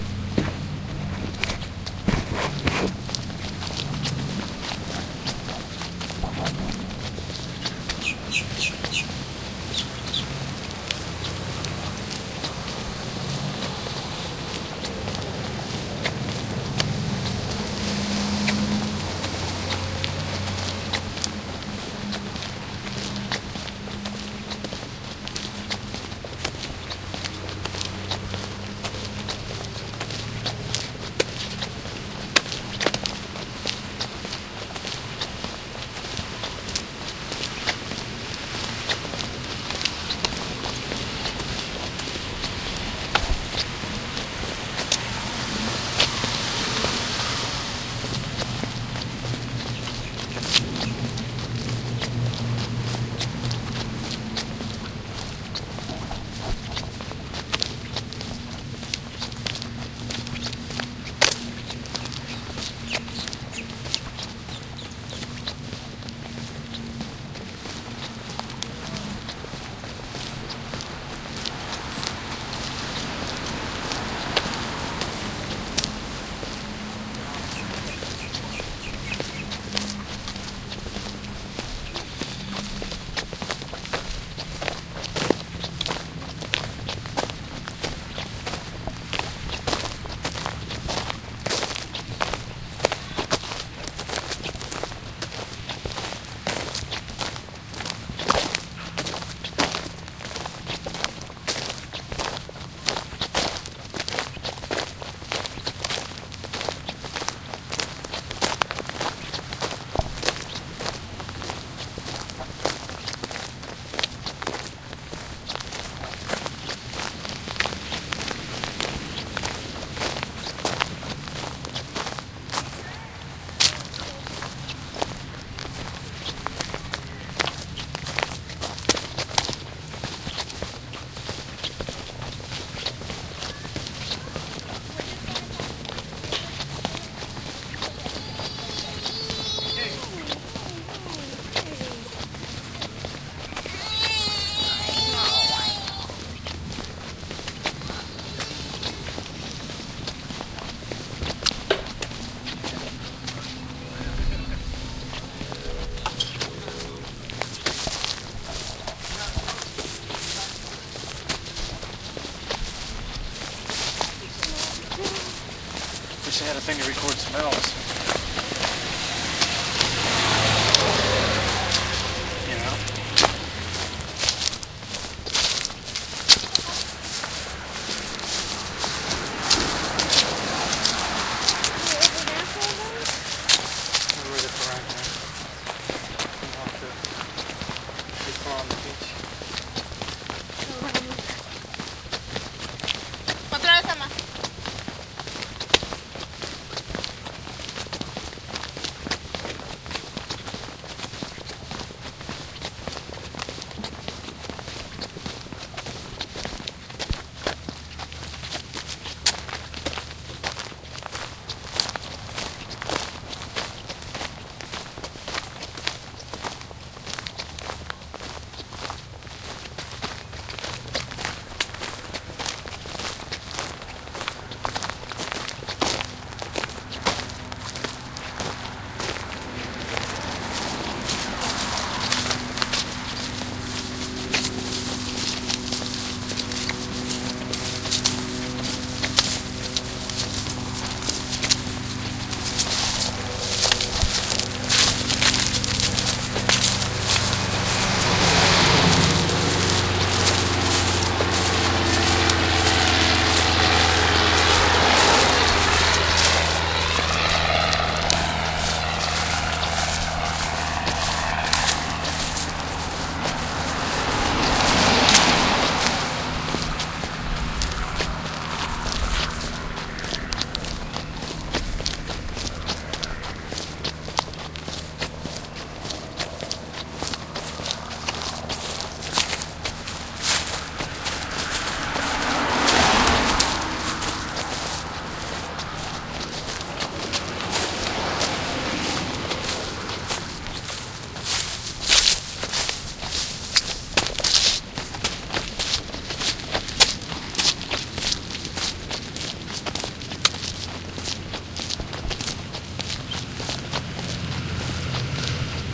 Walking from the Jupiter Inlet on a warm sunny December day with the DS-40.